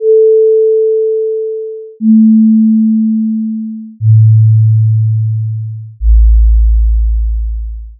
A series of sine waves, descending in pitch, for testing (non-scientifically, of course) the low frequency response of your playback system. This was made with CSound.